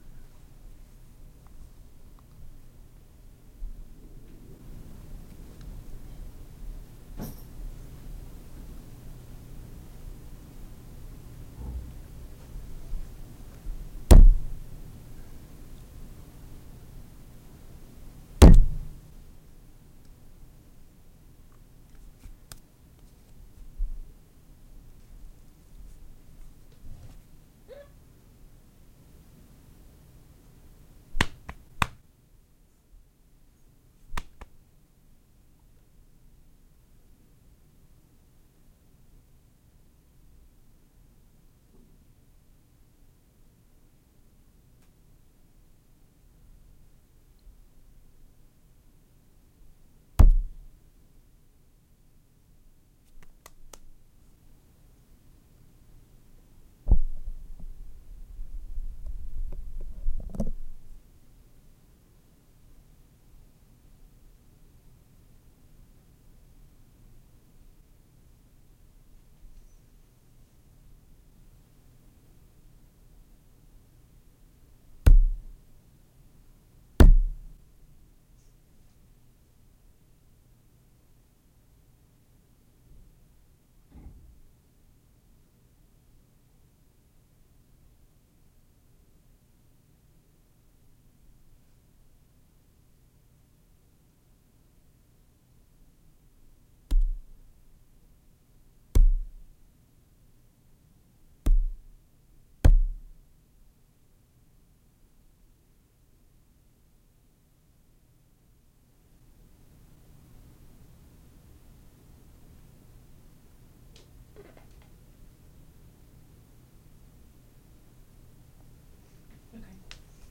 Punching Phone Book
Punching a Phone Book
background, foley, punch